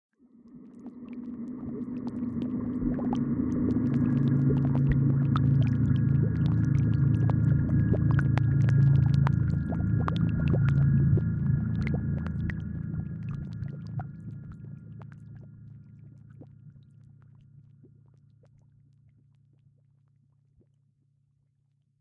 ambient bubbling liquid
The sounds of a bubbling/boiling liquid
bubbling, liquid, boiling, water